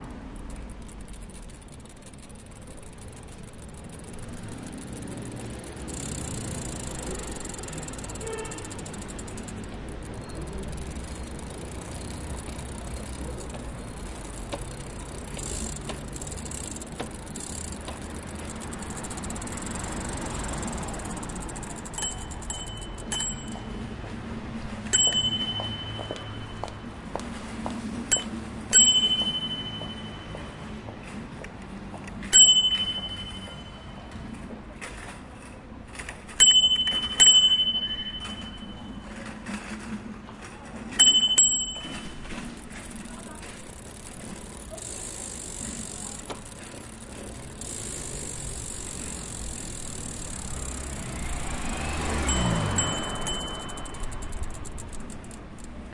bicycle-pedal-streetlife-femalefootsteps-ST
riding a bicycle in the city. street life and footsteps.
bycicle, city, footsteps, pedal, street, transport